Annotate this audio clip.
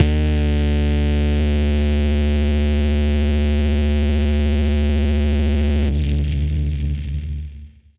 Bass E-string Bend. (simulated feedback)
Was playing around on bass the other night and got this really cool feedback sound out of the e-string. Thought i might as well upload it.